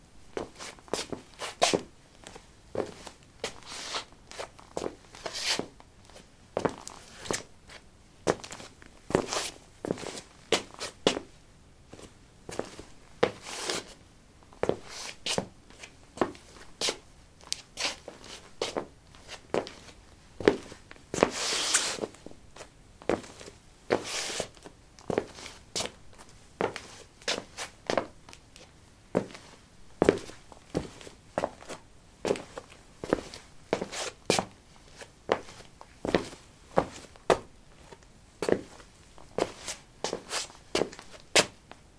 lino 12b squeakysportshoes shuffle threshold
Shuffling on linoleum: squeaky sport shoes. Recorded with a ZOOM H2 in a basement of a house, normalized with Audacity.
footstep, footsteps, walking